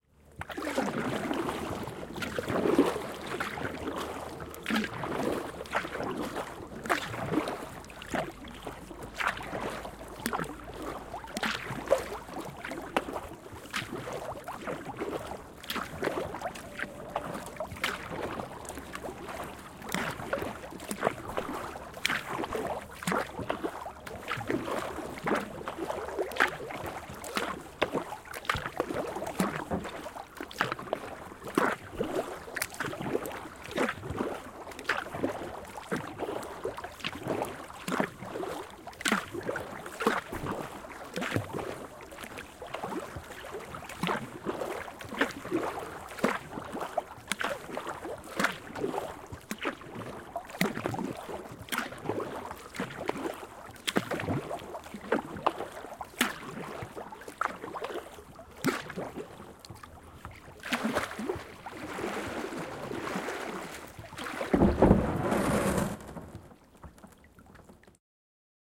Kajakki, melonta, kanootti / Canoeing, a plastic canoe, kayak, paddling on a calm lake, going ashore at the end
Muovinen kajakki. Melomista tyynessä vedessä järvellä. Lopussa jarrutus ja kajakki lipuu rantaan.
Paikka/Place: Suomi / Finland / Vihti, Hiidenvesi
Aika/Date: 02.08.2001
Finland, Kanootit, Field-Recording, Yleisradio, Suomi, Yle, Soundfx, Veneily, Tehosteet, Vesiliikenne, Finnish-Broadcasting-Company, Boating